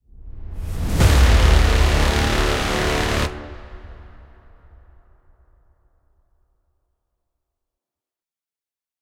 Cinematic Rise-and-Hit 01
Cinematic Rise-and-Hit sound.